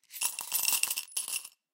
COINS IN A GLASS 15
Icelandic kronas being dropped into a glass
coin, currency, dime, penny